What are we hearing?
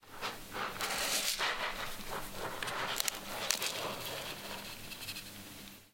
08-2 cat rating in sand
Cat is ratinf in sand. How she can do it ? I dunno
cat, sand